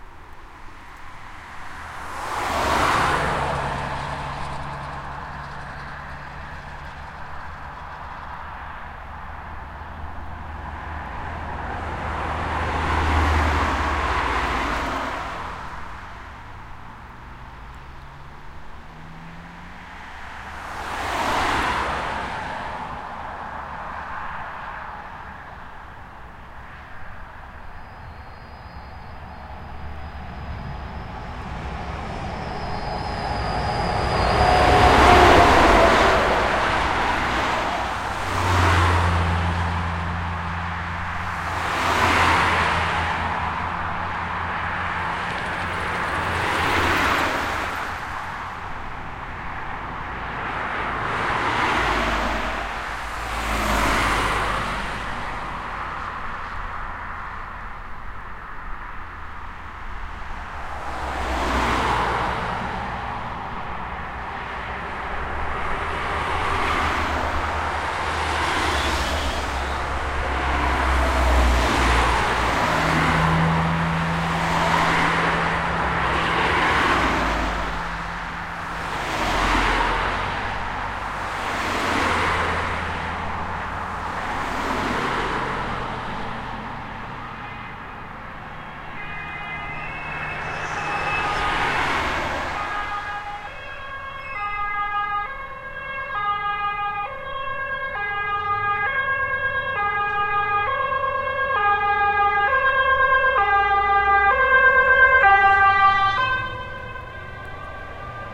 A stereo recording of a local road in my hometown.
cars, traffic, road